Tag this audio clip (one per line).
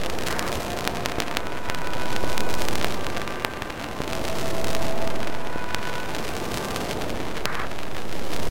melody
love
happiness
orchestra
space
ambient